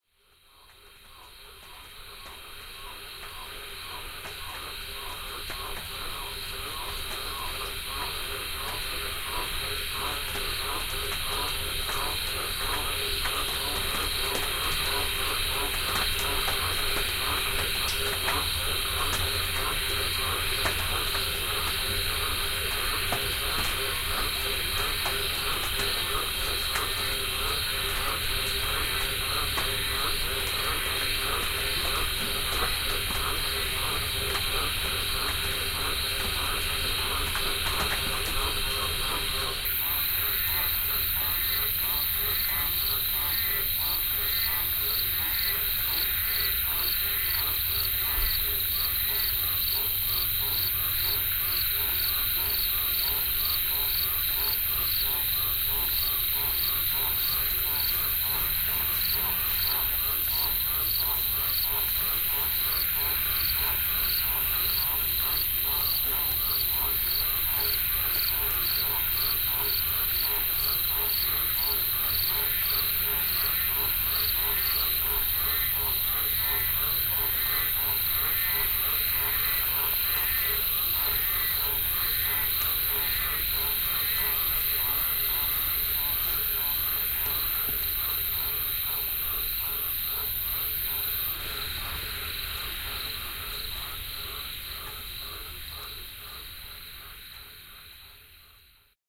recorded in my backyard after a lot of rain, many frogs, crickets, cicadas and a spatter of rain. Location bellingen new south wales australia.